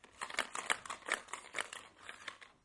essen mysounds burak
ping pong balls moving in a cardboardbox